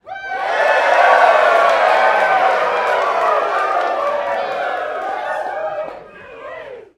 A group of people cheering.
cheering, cheer, crowd, happy, people